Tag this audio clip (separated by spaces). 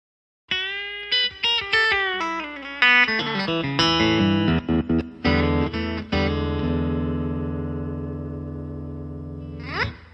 guitar twang